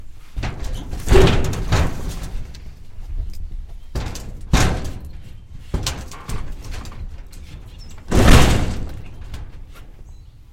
A rattling shed door